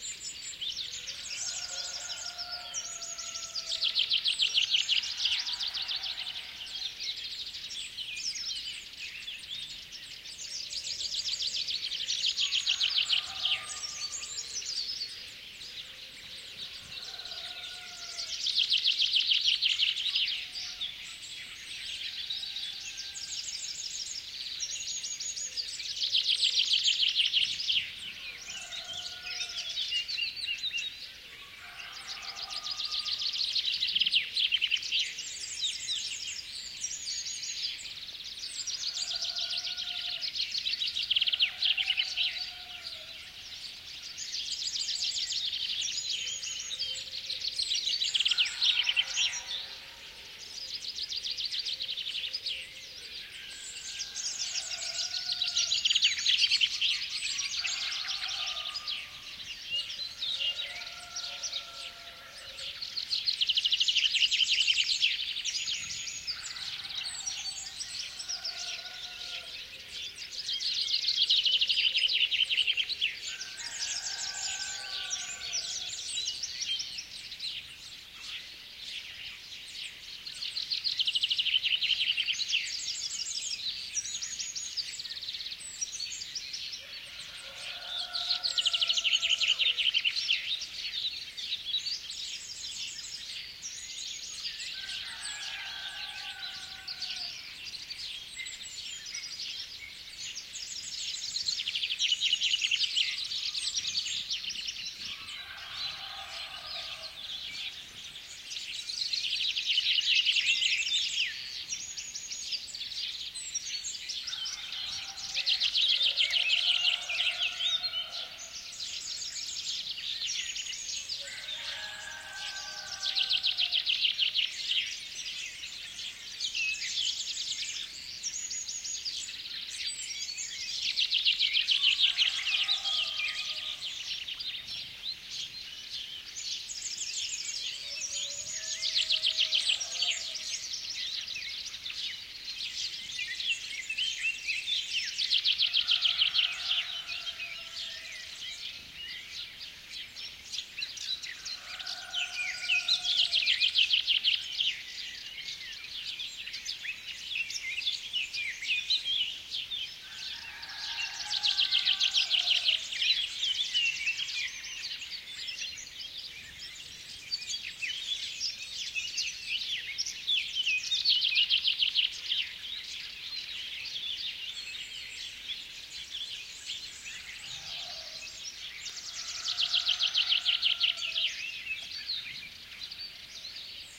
Early morning chorus, lots of bird species in foreground + distant rooster, turkey, and dogs. Recorded in the surroundings of Bernabe House (Carcabuey, S Spain), a naturally reverberant place because of a neighbouring cliff. Sennheiser ME66+MKH30 into Shure FP24, into iRiver H320. Decoded to MS stereo with Voxengo free VST plugin.
ambiance, birds, field-recording, nature